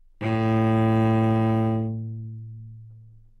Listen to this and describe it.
Part of the Good-sounds dataset of monophonic instrumental sounds.
instrument::cello
note::A
octave::2
midi note::33
good-sounds-id::4580
A2, cello, good-sounds, multisample, neumann-U87, single-note